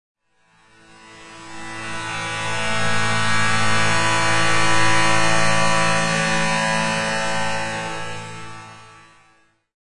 Bagpipe Chorus
Pad sound with a higher pitched almost bagpipe-like sound.
pad, soundscape